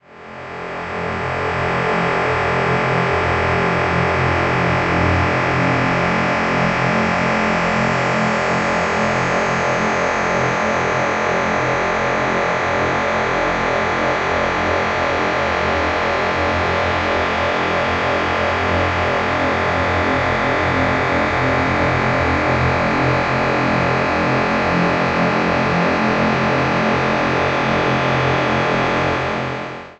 Dark ambient drone created from abstract wallpaper using SonicPhoto Gold.
ambient, dare-22, img2snd, sonification, atmosphere, drone, dark